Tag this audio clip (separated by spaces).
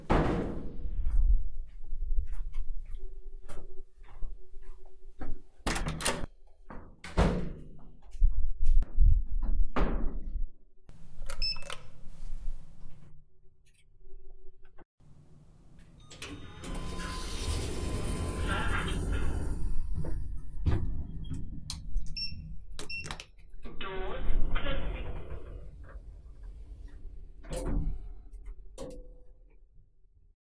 sounds indoor handhold life equipment